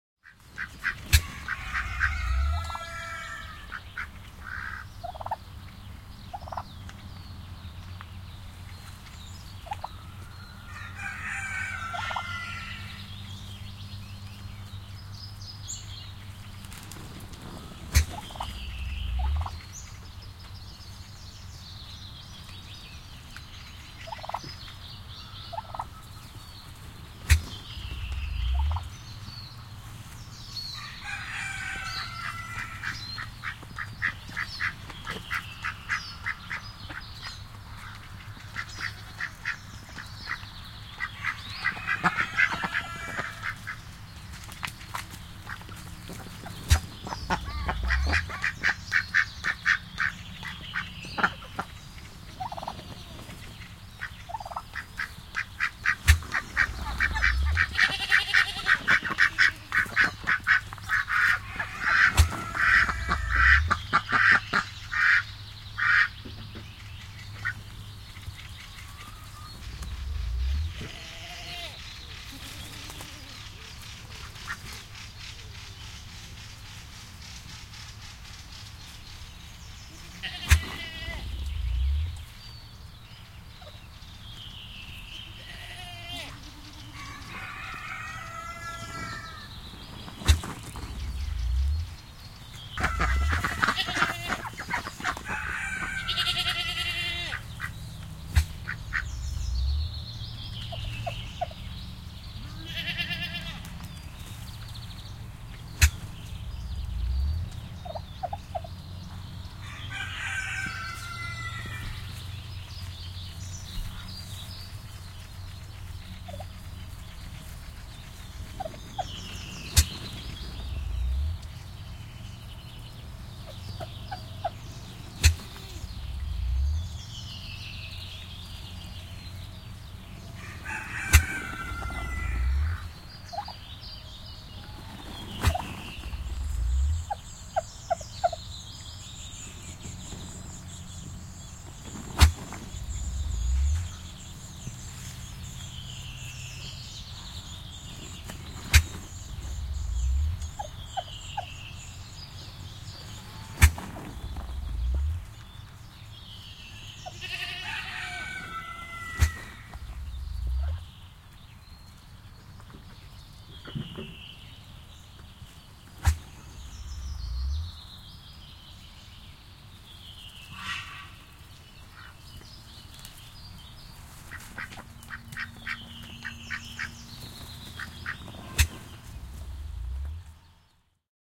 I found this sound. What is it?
Kotieläimiä pihalla, maalaistalo / Farmhouse yard, animals, poultry, a turkey sniffing, birds in the bg
Maalaistalon kotieläinpiha. Siipikarjaa, kalkkuna tuhahtelee etualalla, kauempana vuohi ja kukko. Taustalla vaimeaa liikennettä ja lintuja.
Paikka/Place: Suomi / Finland / Vihti, Ojakkala
Aika/Date: 12.06.1995